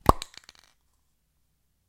Spray paint Cap Off
Removing a spray paint cap, take 1
pop, paint